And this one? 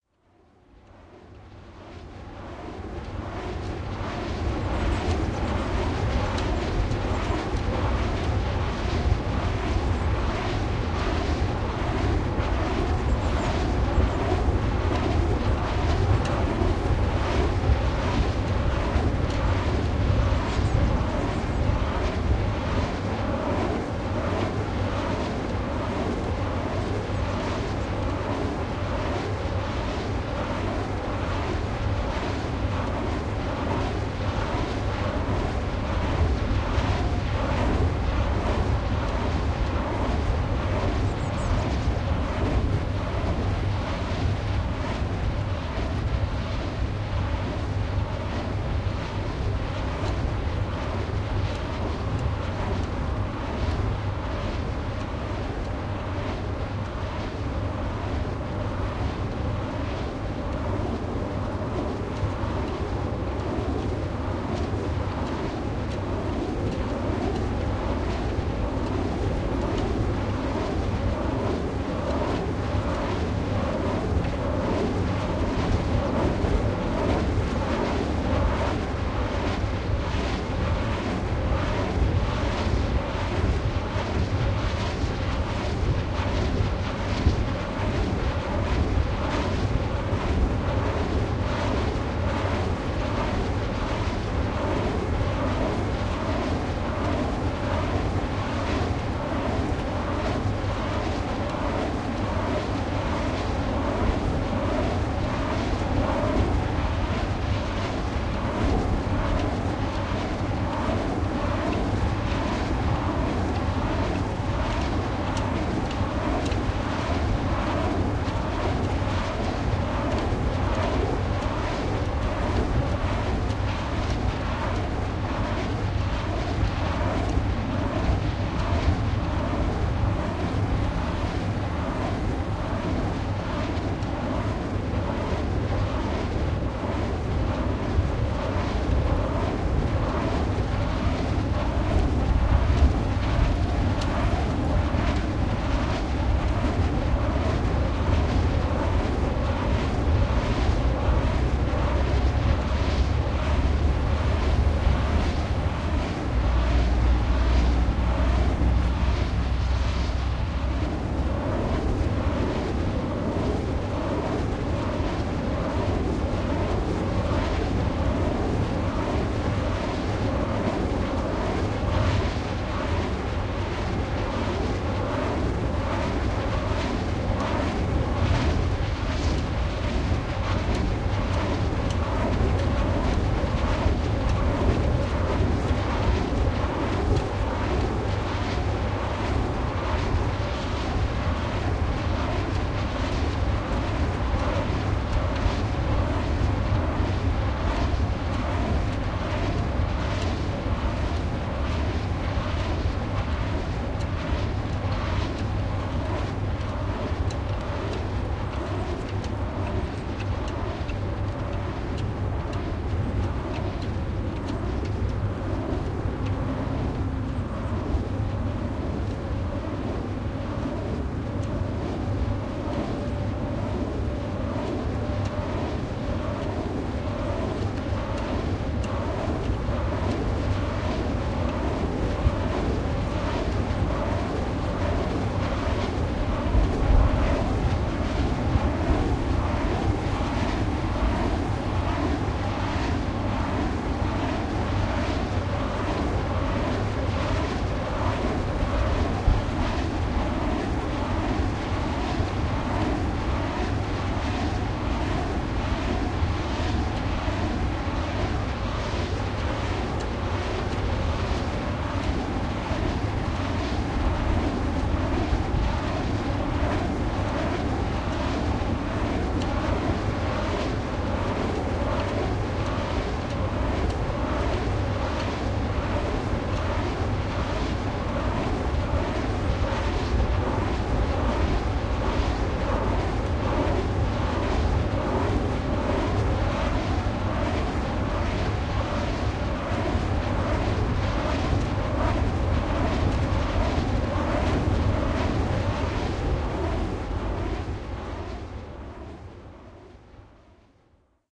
eerie sound of a wind turbine spinning.